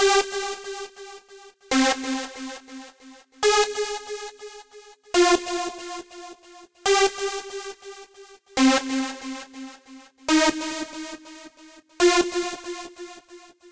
Destiny melody2
distorted, melody, sequence, distortion, techno, synth, 140-bpm, progression, phase, bass, trance, strings, pad, beat